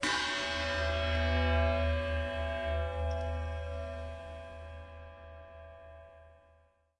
Couv MŽtal with Fx 2
household, percussion